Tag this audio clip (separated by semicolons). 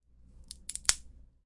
Foley; Homework; Record